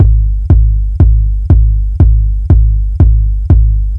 deep kick loop
homemade beat loop in live 7 using non sample based synth instrument.